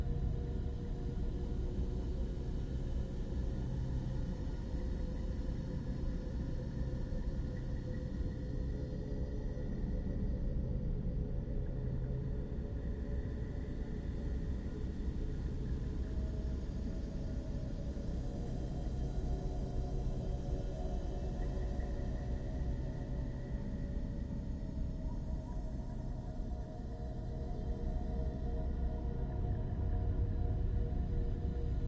galaxy relax sound